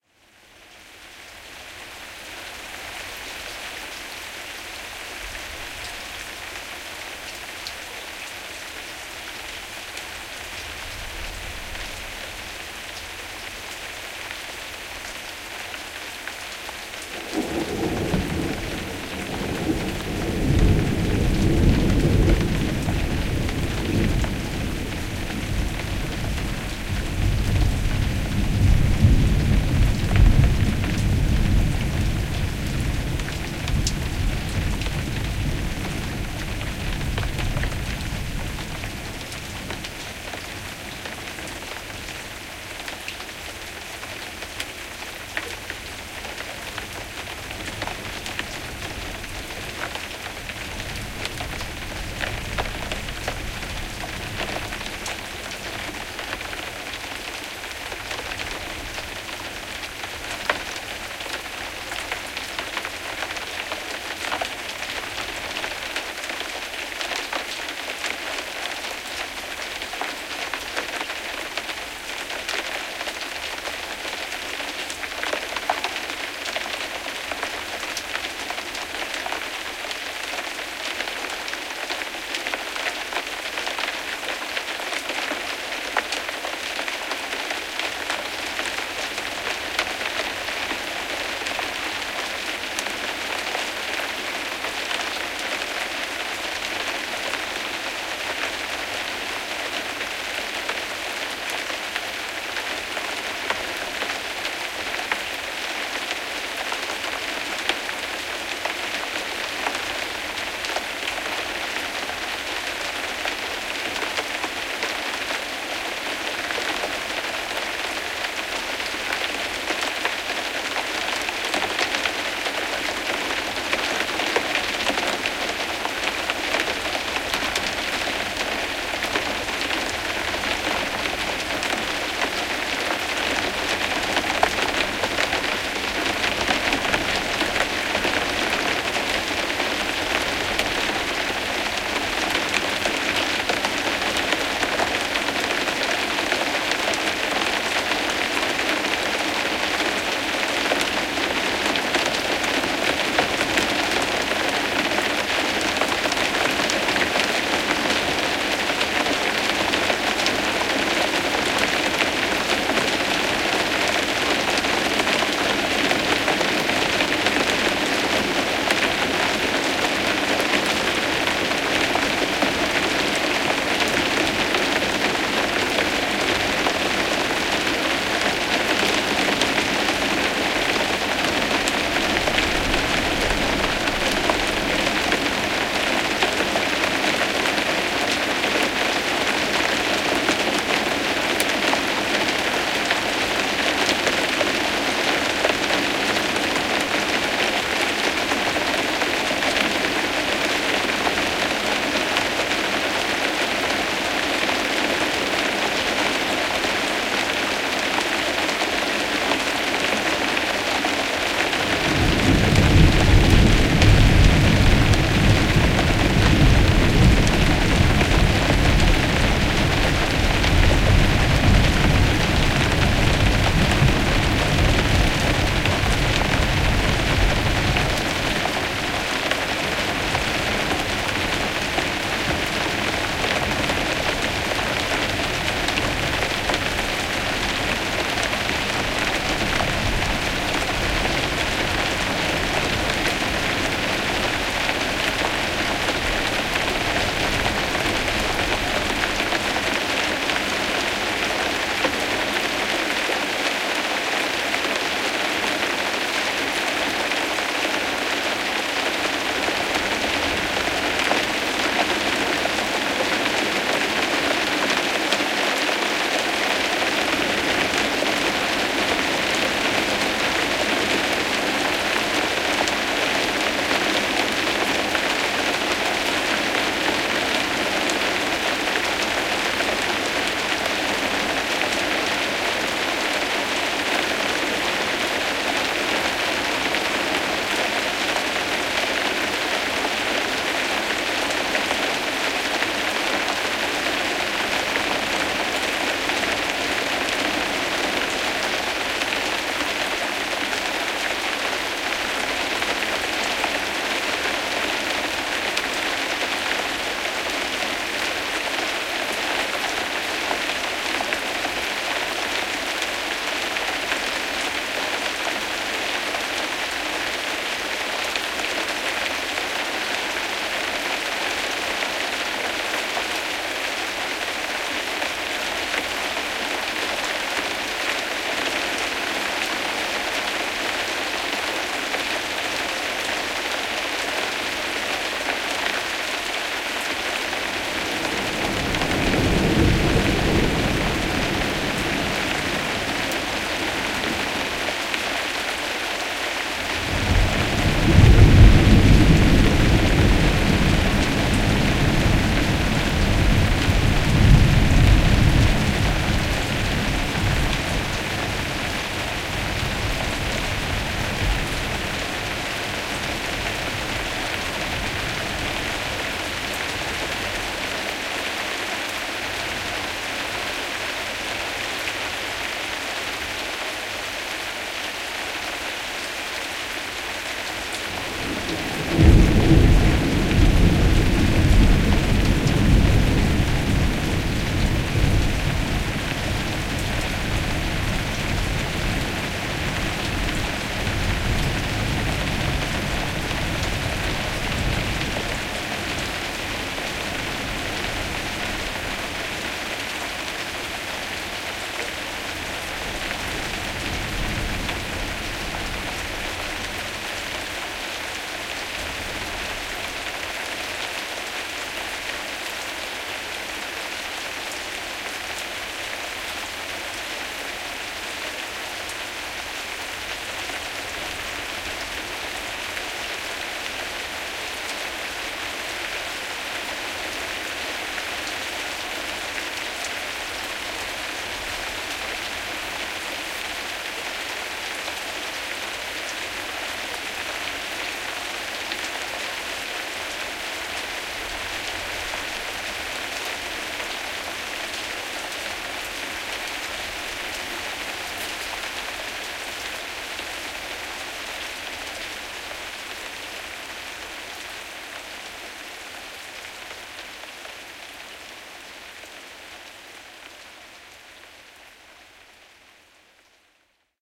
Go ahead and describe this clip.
Reprocessed recording from 2018. Moderate rain recorded in attic. Shingled roof. Discovered mic bump at approx 17-18 secs.